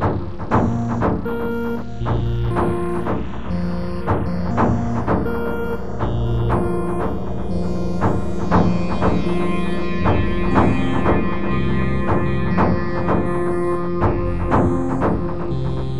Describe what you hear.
This loop has been created using the program Live Ableton 5 and someof the instruments used for the realization Usb Sonic Boom Box severalsyntesizer several and drums Vapor Synthesizer Octopus Synthesizer WiredSampler Krypt electronic drum sequencer reaktor xt2 Several syntesizer diGarageband 3